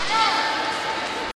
washington lincoln snippet
Small snippet of sound from the Lincoln Memorial recorded with DS-40 and edited in Wavosaur.
field-recording, lincoln-memorial, road-trip, summer, travel, vacation, washington-dc